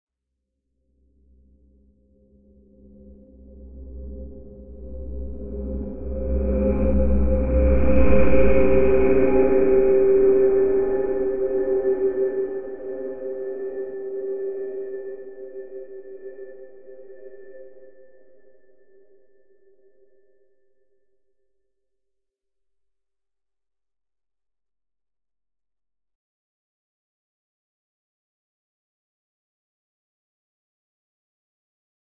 A very interesting sounding drone with some pleasant harmonic development.
ethereal, pad, synthetic